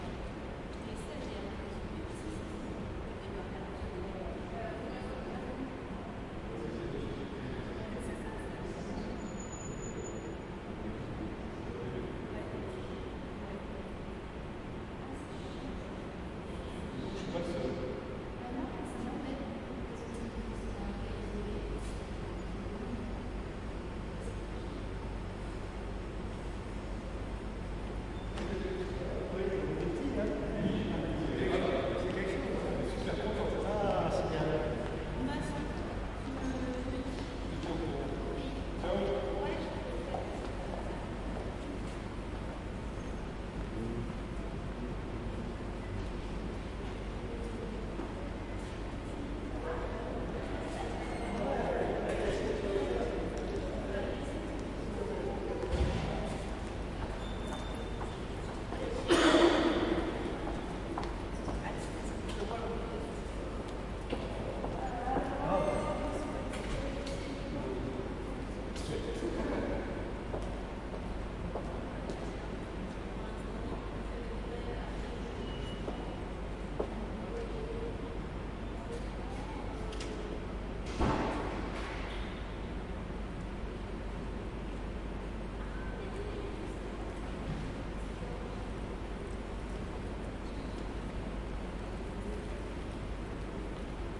Ambiance Hall - Radio France
Ambiance from the main hall at Radio France, it was friday afternoon
france, hall, radio